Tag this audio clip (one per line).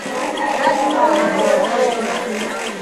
mixing-humans
mixinghumans
sound-painting
toy